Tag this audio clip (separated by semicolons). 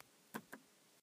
acoustic; ambient; button; click; high; high-qaulity; hi-tech; light; off; press; quality; real; short; sound; swish; switch; synthetic